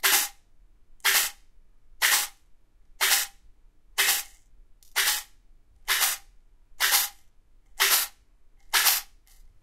Shanking a can with airgun pellets (Diabolo), 4,5 mm. ZOOM H1.
shaking, in-door, loop, rythmic, field-recording, ambient